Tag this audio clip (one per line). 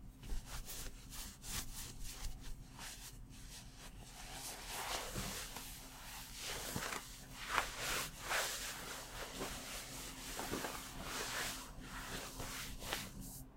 struggle
undress